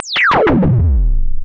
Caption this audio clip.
Space Gun 030
Space gun FX sound created with Created using a VST instrument called NoizDumpster, by The Lower Rhythm.
Might be useful as special effects on retro style games.
You can find NoizDumpster here:
NoizDumpster, computer, TLR, TheLowerRhythm, computer-game, space-gun, arcade, VST, game, FX, phaser, laser, retro-game, lo-fi, space-invaders